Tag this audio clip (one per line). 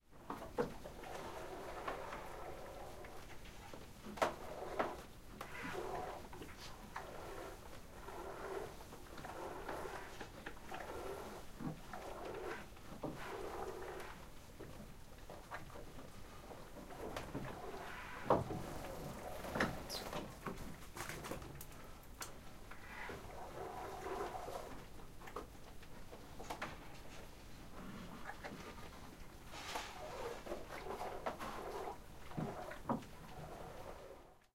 bucket
ch
full
Goat
lait
milk
Milking
plastic
plastique
plein
seau
traire
traite
vre